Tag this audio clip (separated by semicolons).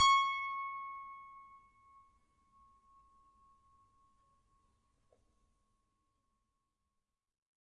fingered; multi; piano; strings